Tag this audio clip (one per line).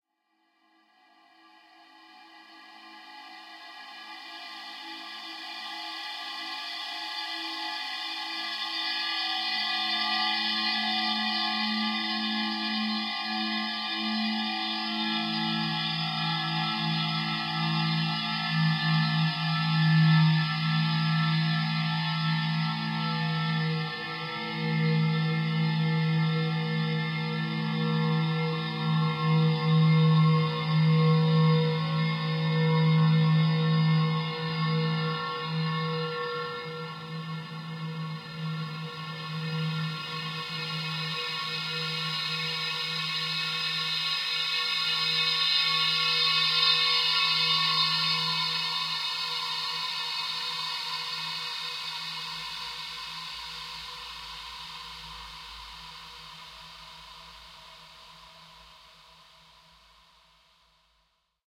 a
metallic
minor
peaceful
resonance
soaring
soft